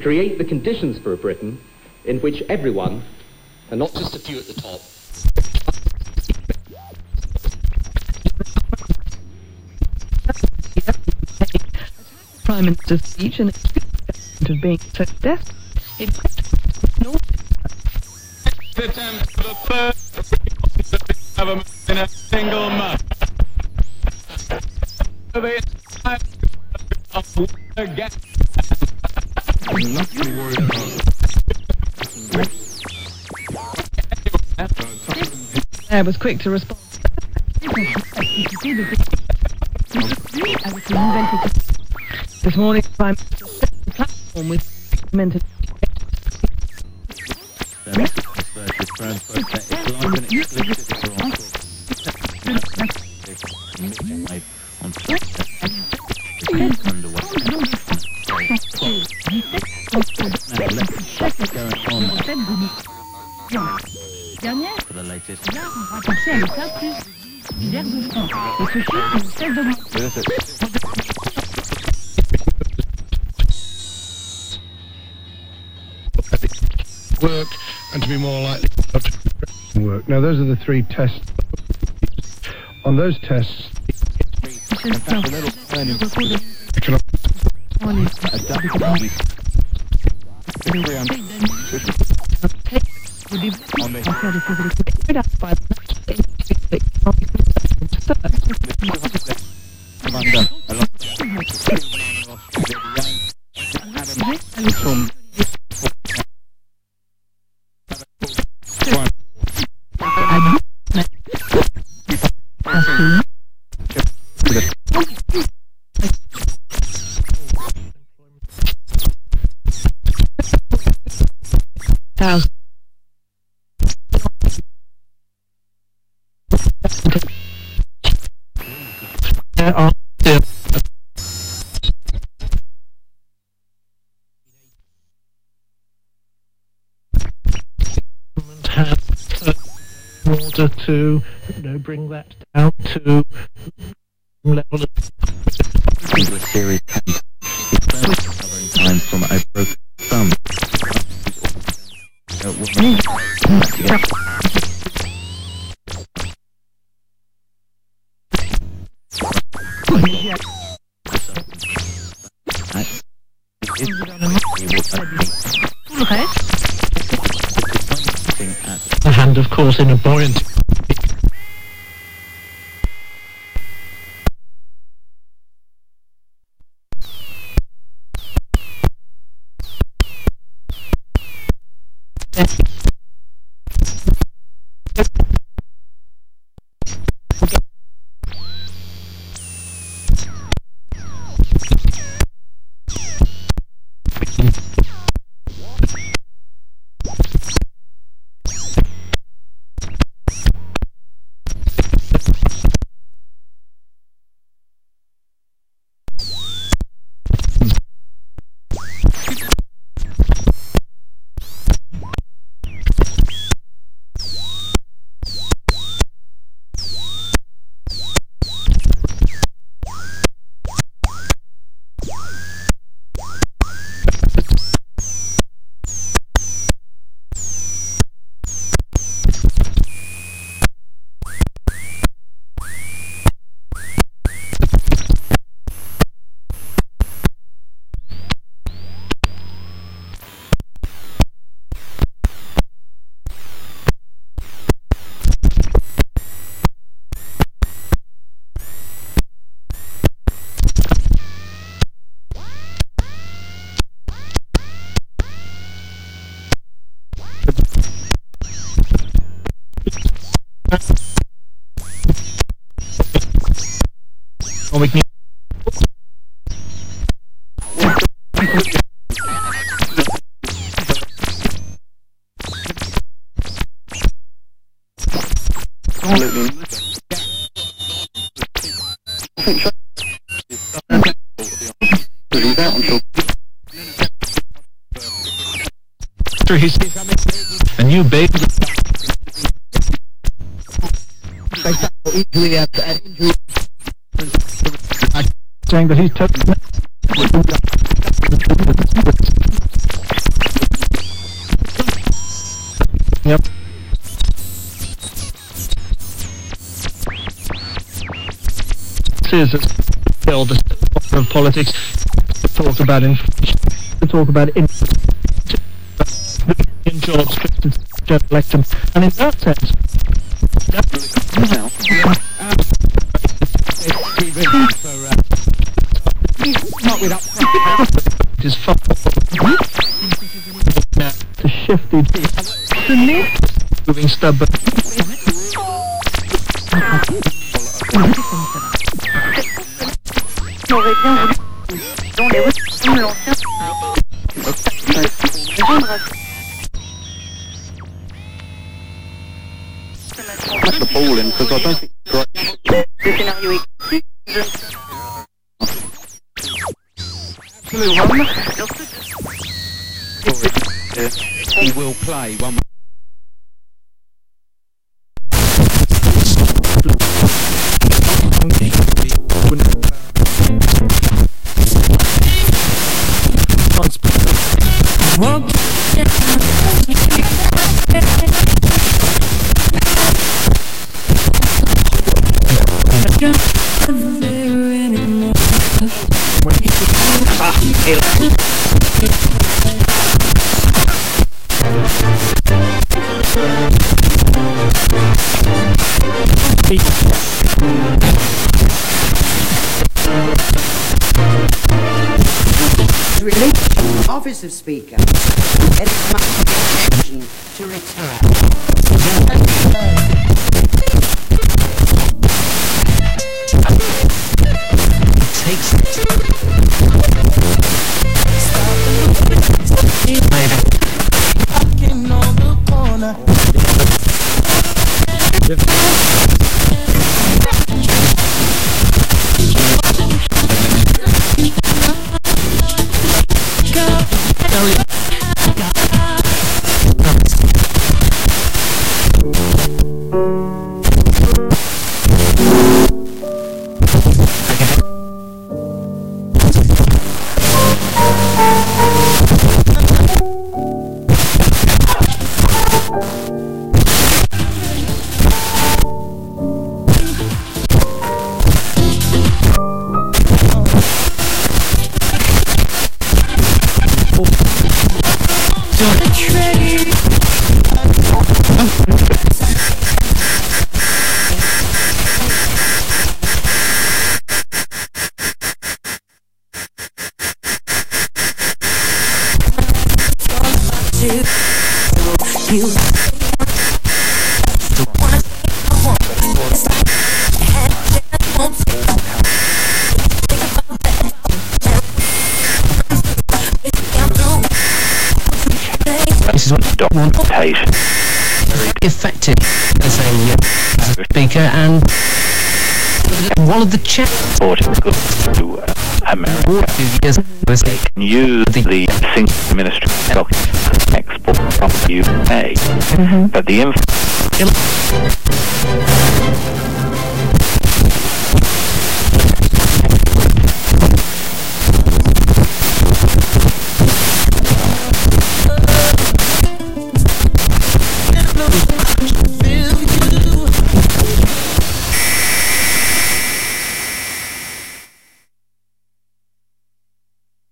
A sequence of crazy radio scratching, plenty of nice sequences can be derived from this baby if you look hard enough :)
scratching, radio, weird, soundscapes, pad, sequence